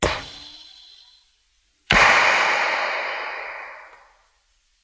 NOT Darth Vader
breath, fx